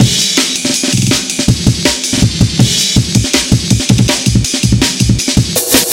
A breakbeat with FX 162bpm. programed using Reason 3.0 and Cut using Recycle 2.1.
break, jungle, beat, dnb, amen, 162bpm, loop